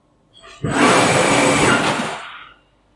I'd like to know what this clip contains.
Laser Machine Diagonal Shift 2
electric, Industrial, Mechanical